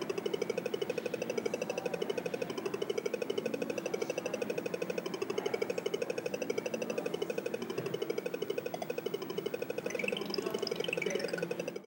ZX Spectrum Music
Some classical piece in an awful variation.
game, game-music